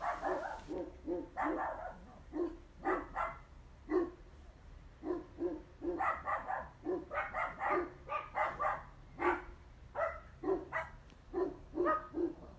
Dogs barking at eachother. Jack russel vs Husky
animal, OWI, barking, dog